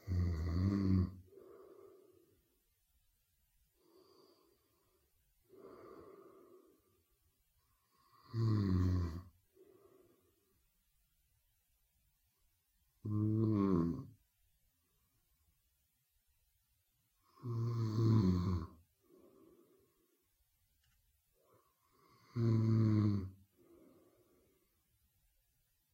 Snoring man-snoring woman snoring
man-snoring, snoring, woman